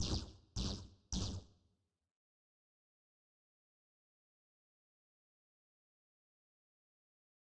LaserGun was created in Kontakt. I sampled myself and then layered it in protools and duplicated the sound and pitch shifted one sound. And then bounced them together.
Aliens, Beam, Game-Creation, Hyperdrive, Laser, Outer, Phaser, Sci-Fi, Space, Spaceship, Warp